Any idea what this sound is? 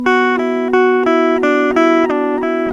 shorter guitar loop with a string droning while the others are rhythmically plucked. the most excited sound out of the burzGuit loops.
ryan played his electric guitar directly into my tascam us-122 usb soundcard. no amp, no mic, no processing.